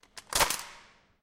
sound 8 - locker key
Sound of a locker being locked.
Taken with a Zoom H recorder, near the door.
Taken nexto the cafeteria (upper floor).
door, open, key, campus-upf, coin, locker, UPF-CS14, unlock, lock